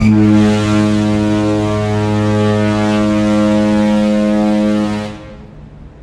Ship Horn - Fog Horn - Cruise Ship Grand Princess
Recorded: February 2022
Location: Grand Princess (Cruise Ship)
Content: Fog horn during late night fog
boat, field-recording, fog, fog-horn, foghorn, harbor, horn, lighthouse, ship, storm, weather